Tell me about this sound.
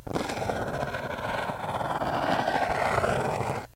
A wooden match scraping slowly over the striking surface of a match box. Recorded with a Cold Gold contact microphone into a Zoom H4.